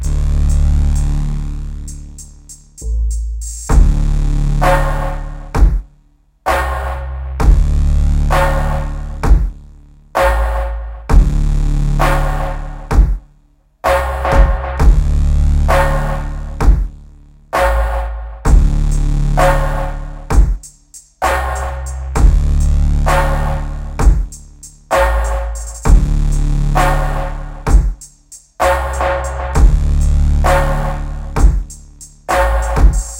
Drumloop Trap and Saw Bass Masterizer BPM 130.1
Drumloop, Rap, Trap